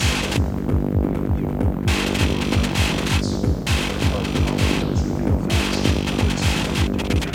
Music Loop 1
One shots and stabs for techno experimental or electronic sounds. Some loops some sound shots.
design, electronic, experimental, granular, groovy, improvised, loop, music, rhythmic, sound, stabs, techno